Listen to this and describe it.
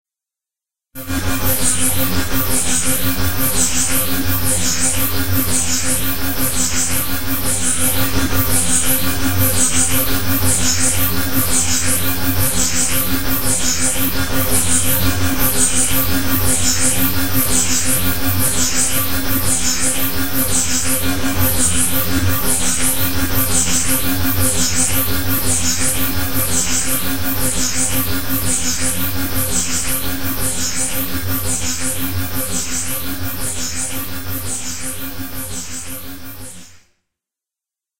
FactoryFusionator 4a: Machinery used to mfg transportation pods for the SynGlybits.
Factory, Fusion, futuristic, sci-fi, strange, synthetic, unusual, weird